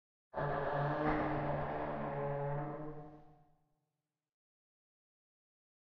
Bending Metal
bend, bent, echo, metal, noise, Squeak, squeaky